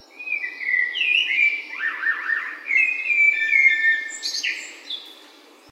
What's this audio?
20070415.funny.blackbird
flute-like trill from a blackbird. Sounds is not very good, but weird enough to catch my ear.